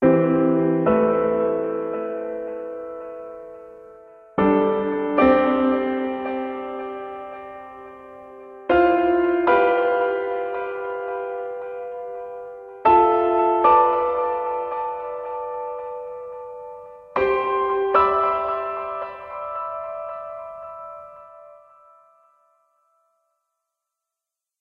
Small progression, part of Piano moods pack.